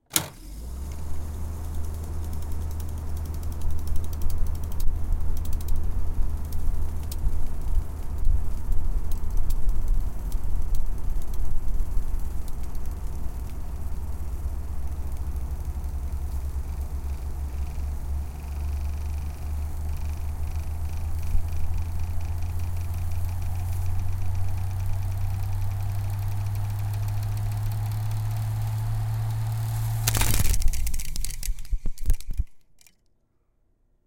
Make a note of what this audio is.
reel to reel tape machine start stop rewind spinout flappy smaller reel
reel, start, rewind, spinout, stop, flappy